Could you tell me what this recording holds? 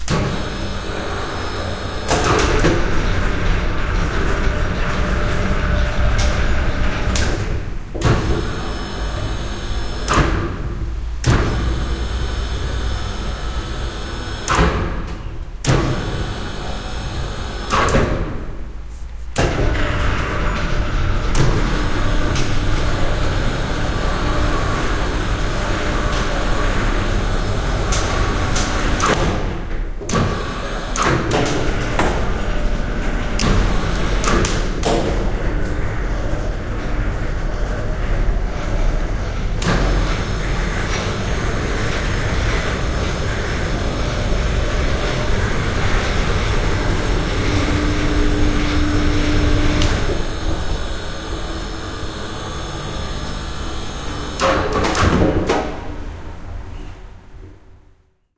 electric hoist
I recorded the sound in the studio of a sculptor Yves Dana. He works with large blocks of marble that he moves from one side to the other of its beautiful space.
electric, factory, hoist, workshop